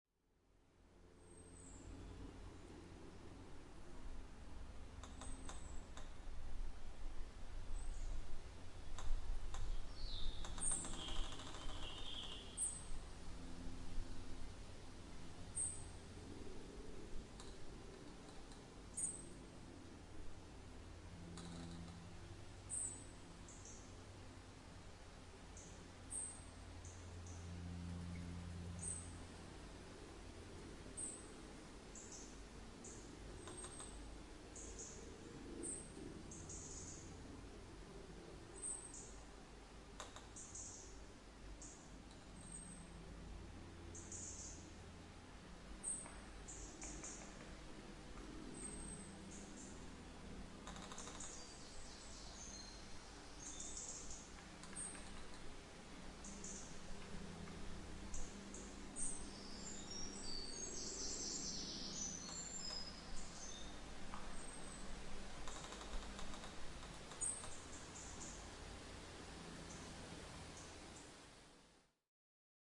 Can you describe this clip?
Creaky tree in woodland
Creaking tree blowing in the wind. Recorded in RSPB Stour Estuary Woods, Wrabness, Essex, UK. Recorded with a Zoom H6 MSH-6 stereo mic on a breezy summers day.
calm, creaking, creaking-tree, creaky, creaky-tree, essex, estuary-woods, field-recording, forest, forest-horror, forest-uk, horror-score, horror-woodland, msh-6, rspb-stour-estuary, rspbstourestuary, scary-creak, summer, tree, tree-creak, uk, ukforest, woodland, woods, wrabness, zoom-h6, zoomh6, zoomh6msh6